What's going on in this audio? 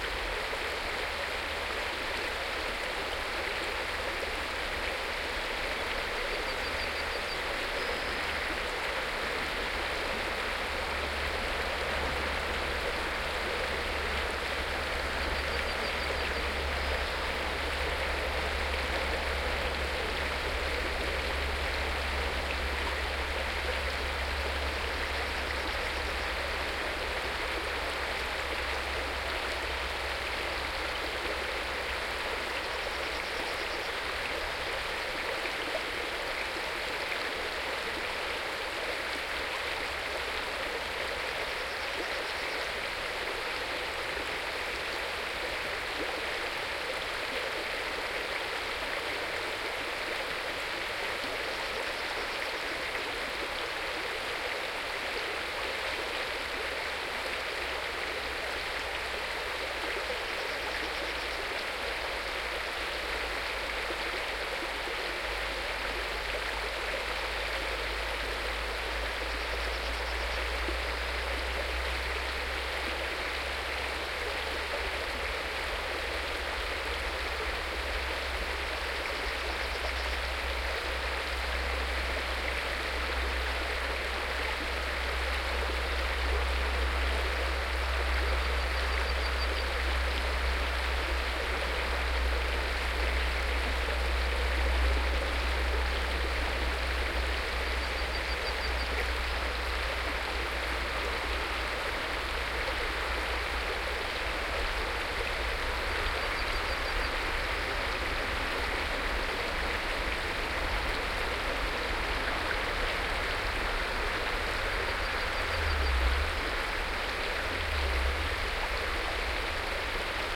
binaural mountain stream and yellowhammer
This track is pretty loopable: the river "Innerste" near "Lautenthal" in the harzmountains / germany. Recorded at the end of May 2009, using the R-09HR recorder and the OKM microphones, together with an A3 adapter, therefor pretty binaural. The river sounds like that at that time of year, but very different now or in the month to come.
binaural, burn, field-recording, harz, harzmountains, river, stream, yellowhammer